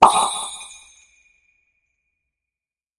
A sound made for when one party heals another.
ringing, bells, pop, echo, positive